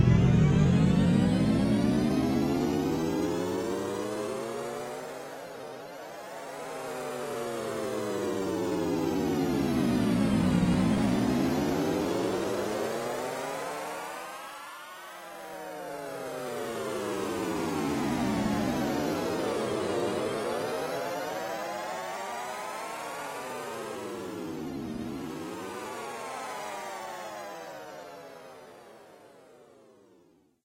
same sound as spaceship takeoff but time stretched, speeded up, reversed and alternated between forward and back